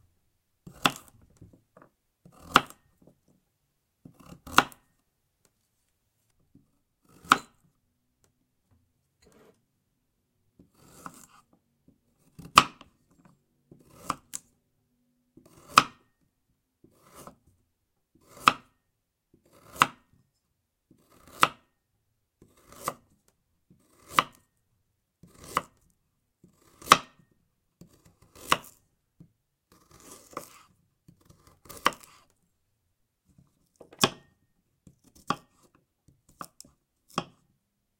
Cutting the carrot.